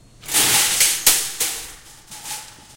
A grocery store shopping cart crashing into another. Marantz PMD-671, Rode NT4. 2005.
shoppingcart, crash, cart, shopping